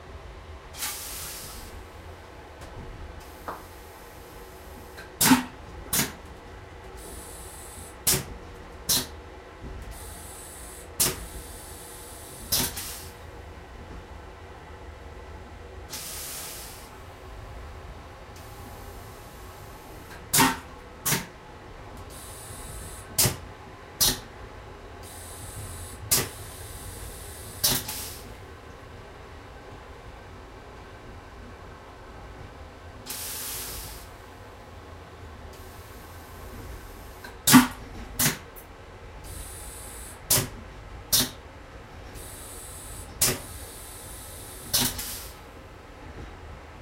Recording of train toilet. I think that it is a chemical toilet, but I am not sure. You can hear moving train and flushing three times. REcorded with Zoom H1.